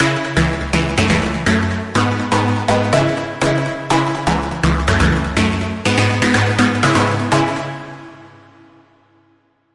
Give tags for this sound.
chord stabs interlude sound mix dance instrumental trailer pbm beat drop club trance sample intro radio background techno pattern dancing music move part jingle loop stereo podcast dj broadcast disco

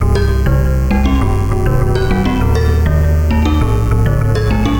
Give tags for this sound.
strange 180 loop

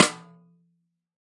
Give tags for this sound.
1-shot drum multisample snare velocity

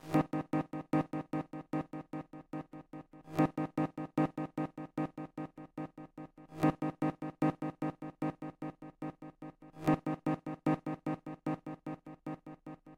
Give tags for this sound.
loop piano reverbed echo

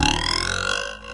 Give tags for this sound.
ambient effect jew-harp musical pcb tech trump

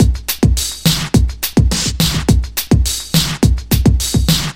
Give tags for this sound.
105bpm beat break breakbeat drum loop ragga reggae

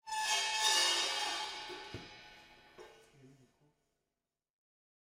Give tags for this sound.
ambient msic noise

